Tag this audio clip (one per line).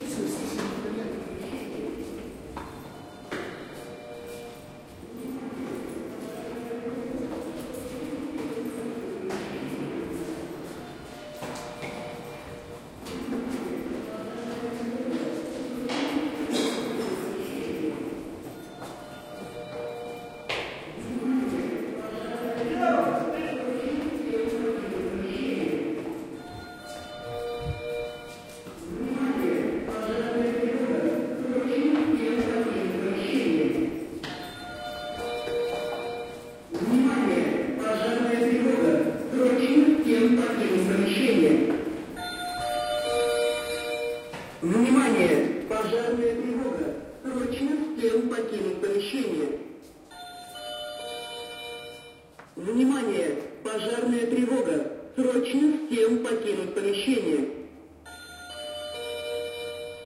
alarm
fire
fire-alarm
office
russian
russian-speech
speech
staircase